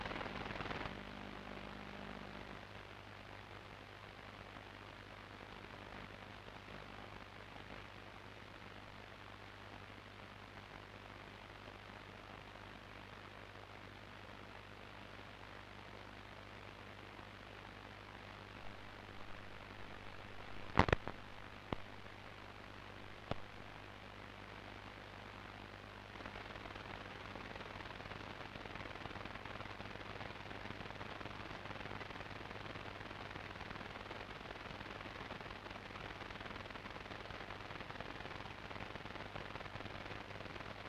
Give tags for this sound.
tuning
short-wave
noise
radio-static

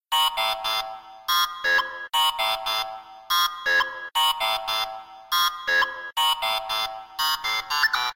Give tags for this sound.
cellphone
piano

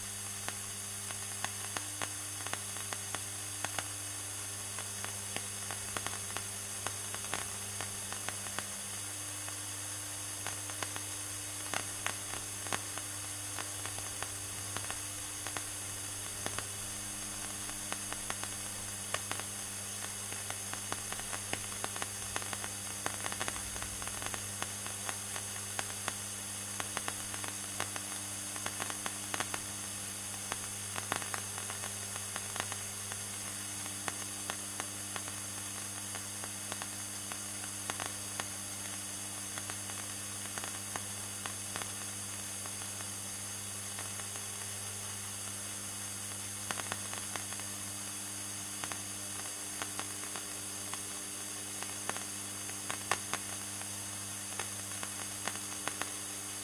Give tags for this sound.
noise
cracks
cosmic
clicks
radio
hiss

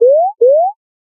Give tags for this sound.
alarm
caution
warning

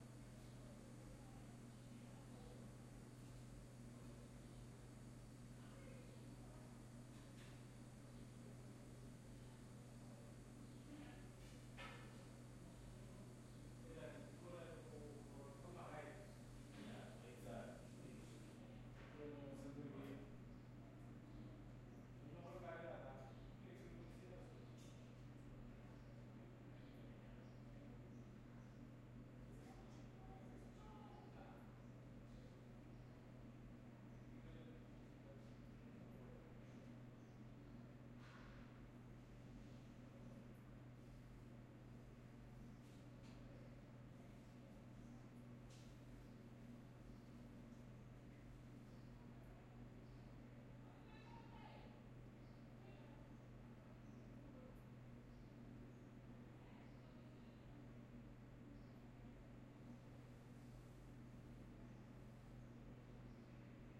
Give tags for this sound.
Ambience
Bathroom
owi

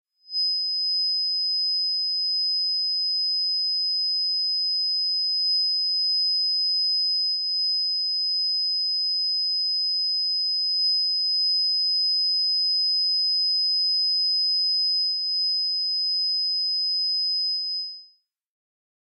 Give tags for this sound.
speakers
noise
feedback
whistle

